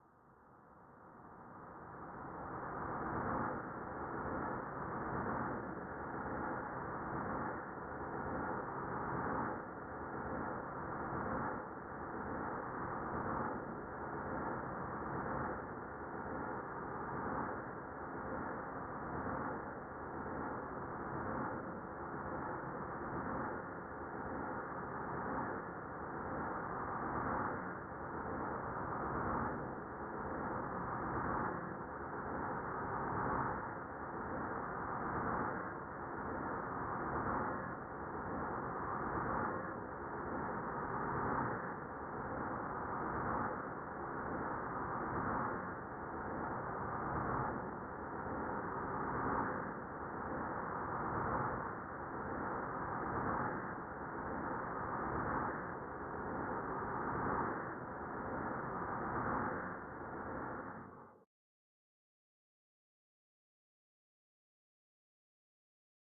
Fx_Soundscapes from manipulating samples(recording with my Zoom H2)
fx, pad, wind